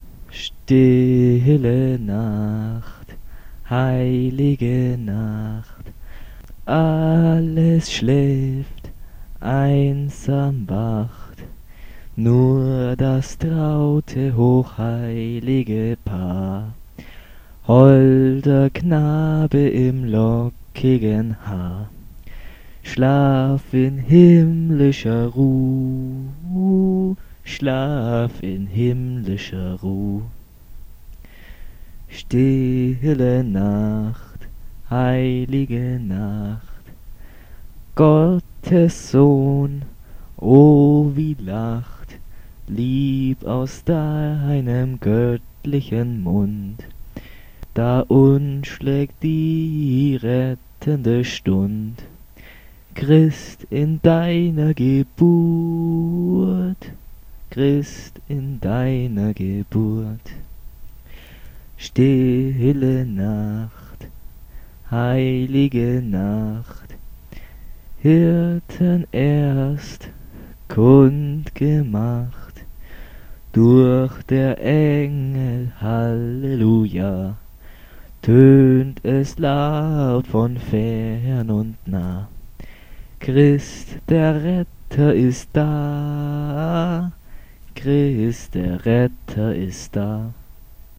Stille Nacht - I try to sing
My try to "sing" for the user zostar. It's the german version of Silent Night.
I don't think I'm a good singer but I wanted to help her so much!
But if you send me your project where you use this or parts of it I will be very glad!
Made with my voice and recorded with a cheap microphone in Audacity.
christmas, german, heilige, nacht, night, silent, song, stille